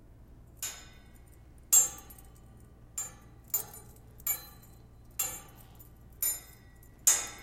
metal clanking
clanking, clank, metal, echo